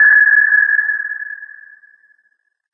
U-Boat Sonar Sound
second, 2, alert, submarine, world, ii, boat, u, war, u-boat, uboat, sound, sonar, warn